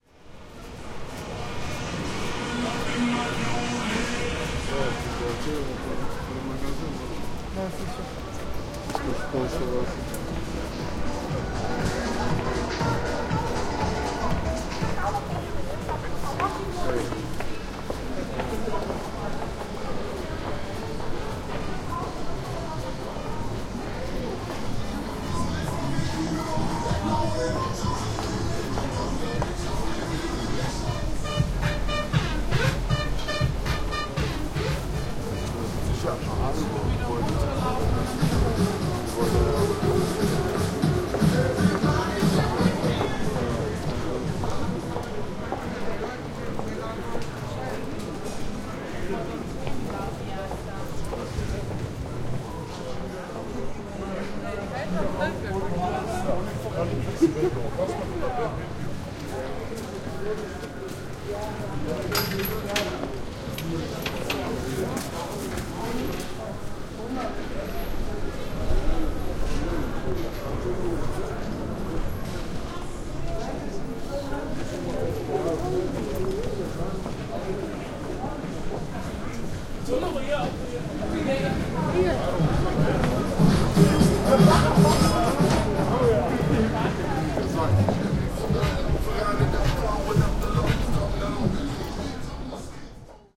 Recorded walking over the Nieuwendijk shoppingstreet in Amsterdam, Holland. You can hear people talking and music as I walk along the shops.
crowd, amsterdam, music, field-recording, shopping-area, exterior